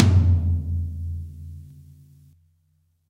Middle Tom Of God Wet 018
drum, drumset, kit, middle, pack, realistic, set, tom